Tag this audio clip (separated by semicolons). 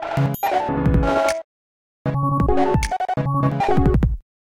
ambient; glitch; idm; nes; snes